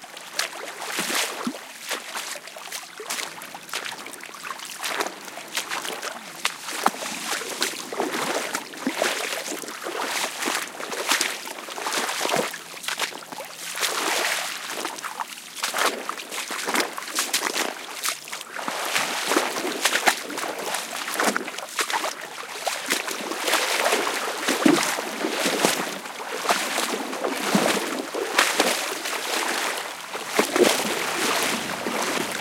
walking on shallow water. Shure WL183, Fel preamp, PCM M10 recorder. Recorded in an inlet near Las Negras (Almeria, S Spain)